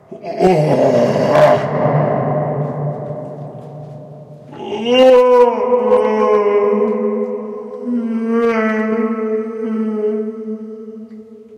scream and moaning, with echo and low-pitched. Recorded inside the old cistern of the Reina (Badajoz province, S Spain) castle. Primo EM172 capsules inside widscreens, FEL Microphone Amplifier BMA2, PCM-M10 recorder.